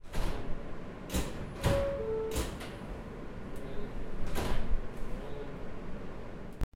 NYC subway train, doors trying to close
new, doors, nyc, close, closing, train, city, subway, york